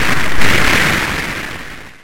a synthetic sounding lighting strike created with the Bristol Moog Mini emulation and slightly post processed in Audacity.
There are 3 different ones to add some variations.
striking, lightning, sound